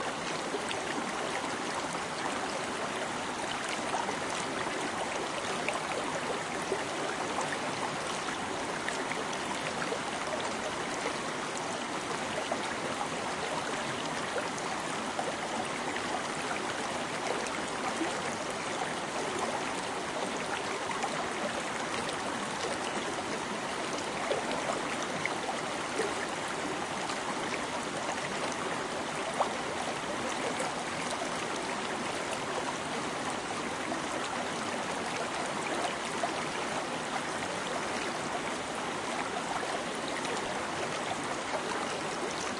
Small mountainous river with boulders, the water is quiet rippling and bubbling.
small, rippling, water, river, brook